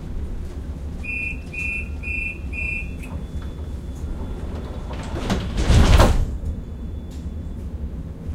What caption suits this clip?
Recording of the tramdoor closing, first you hear the warning beeps, then the sliding door.